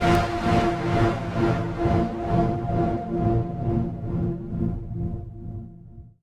sound of my yamaha CS40M analogue
analogique, fx, sample, sound, synthesiser